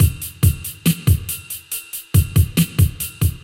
4 Beat 08 Triphop
4 Beat Drum loop for Triphop/Hiphop4 Beat Drum loop for Triphop/Hiphop
drum-loop, Triphop, drums, beat, loop, Trip-hop